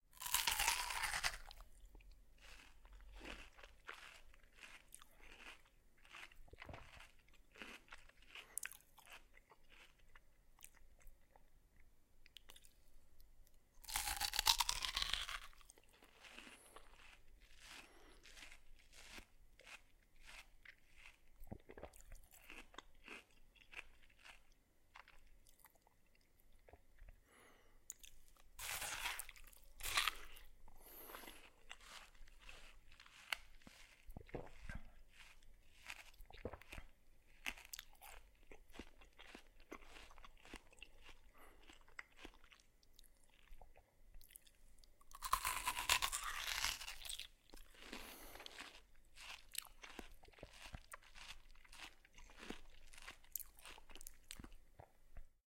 Eating watermelon, multiple variations. Recorded close to the microphone to capture all the subtle sounds.